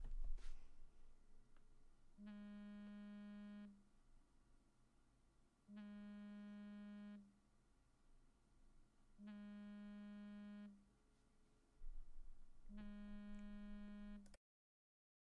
phone-vibration
short cellphone vibration :)
Electronics, Vibration